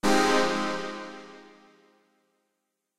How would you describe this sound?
music game, dun 2
Music created in Garage Band for games. A dun-like sound, useful for star ranks (1, 2, 3, 4, 5!)
dun, game, game-music, music, music-game, score